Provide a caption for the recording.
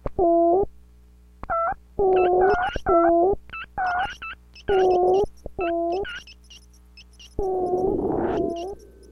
An Electribe EA1 playing some notes through a Nord Modular and other effects.
nord, noise, glitch, digital, synth, modular, electribe